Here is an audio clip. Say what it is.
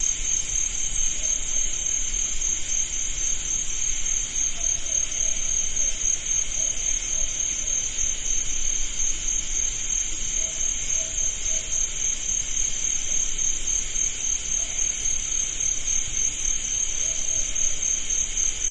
Cricket Noises 5
Recording of crickets/bugs at my house at dusk in TN